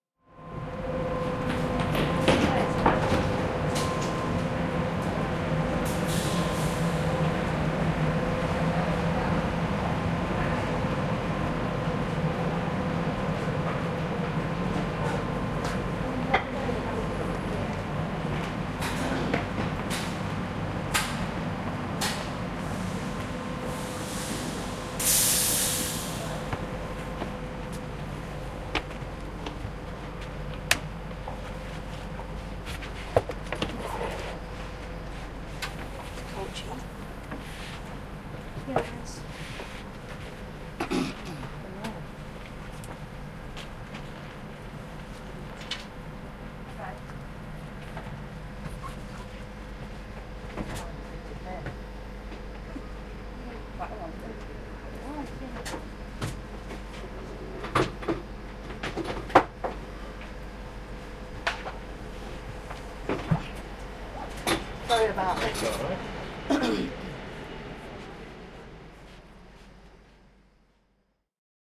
Boarding the Kings Cross London train at Doncaster station in Yorkshire, England.